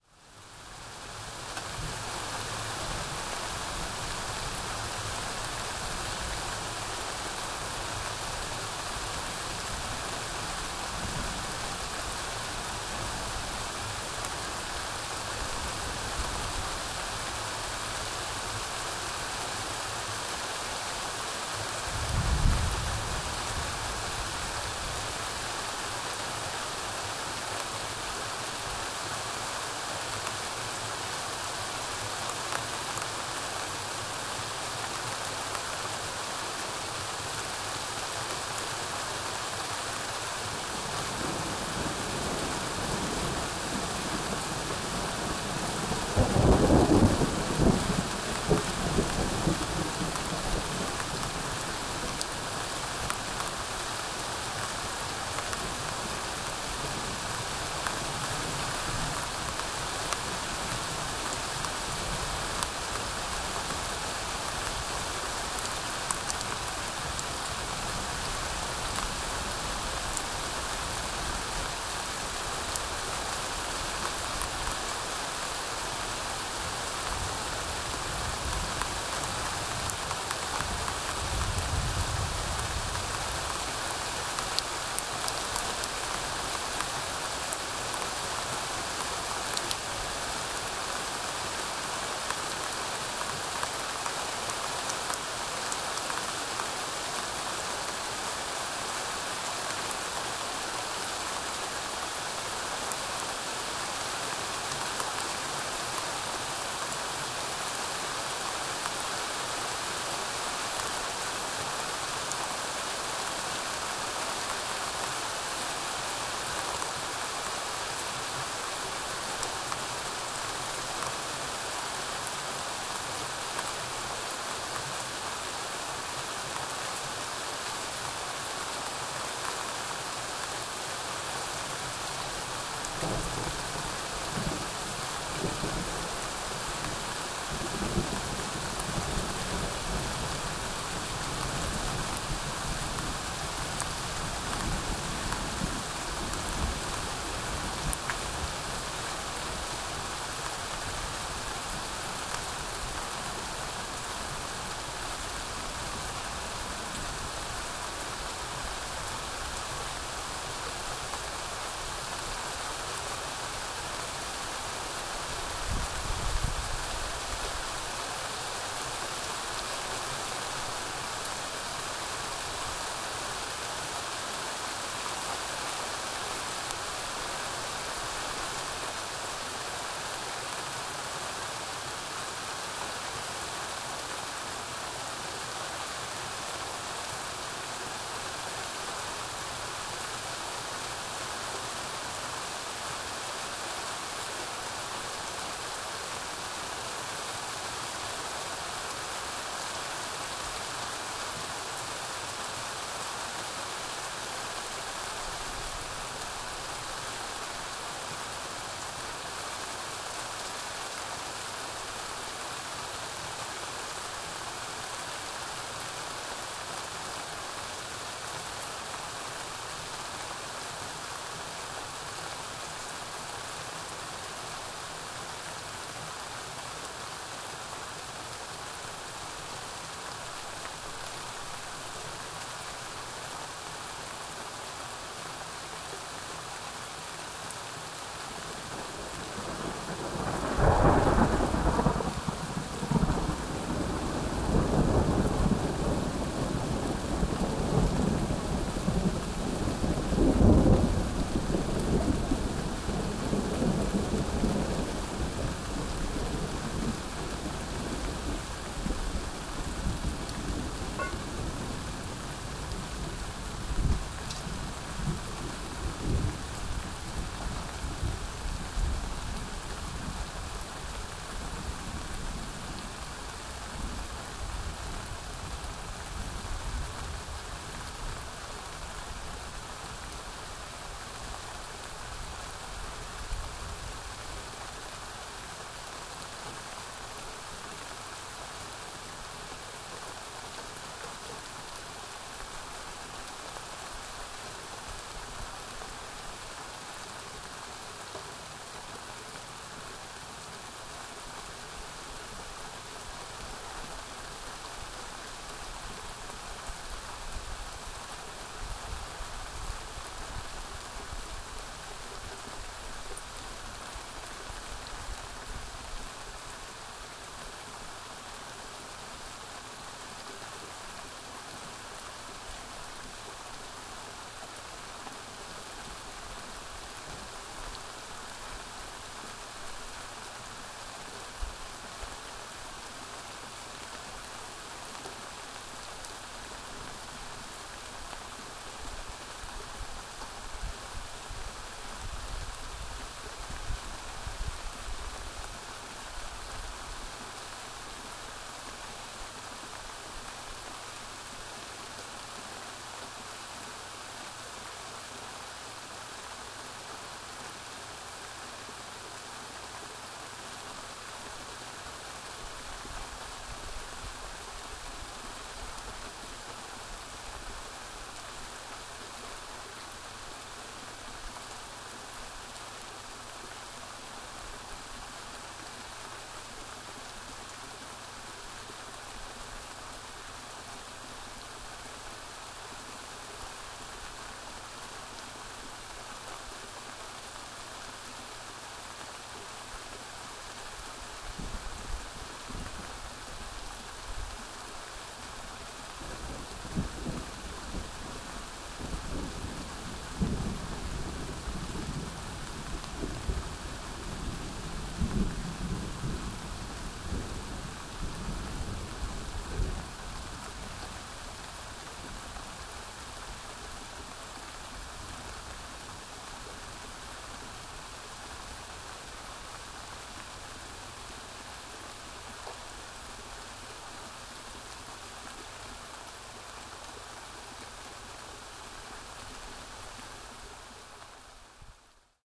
Rainstorm and Thunder
Recorded using my Droid Turbo in the windowsill and has surprisingly good sound quality. Thunder is consistent and perfect for ambience.
raining, ambient, window, thunder, thunderstorm, nature, ambience, wind, field-recording, inside, weather, night, shower, thunder-storm